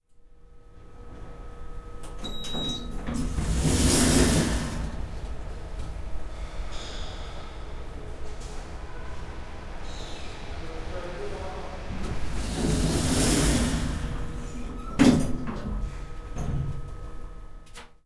Elevator complete
Elevator doors opening and closing. Recorded by a Zoom H4 on Tanger building, campus Poblenou UPF. Audacity software used to edit the sample.
elevator, machine, UPF, field-recording, building